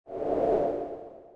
Short noise designed for game responsiveness, lower pitch from SwitchA.